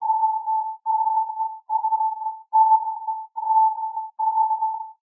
A few octaves in A of a sound created with an image synth program called coagula.
multisample, space